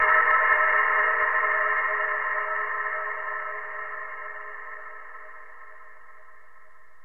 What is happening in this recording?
ice cave
A single percussive hit with reverb resembling an icy cavern.
cave, cold, hit, ice, reverb, single